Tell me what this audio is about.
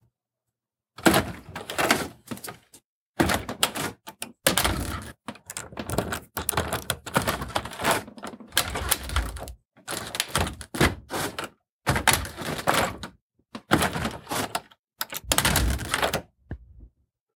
Cottage Door Handles - Assorted Opening, Rattling and Closing
Recorded in a vacation cottage with a Zoom h4 in Okanagan, BC, Canada.
cabin, door, field-recording, h4n, open, clunk, handle, wood, cottage, clank, opening, knock, close, wooden, closing, bang, audiodramahub, rattle